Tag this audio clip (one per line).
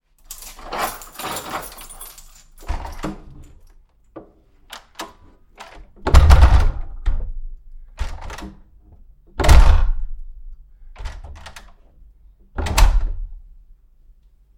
close
closing
door
doors
Front
open
opening
wooden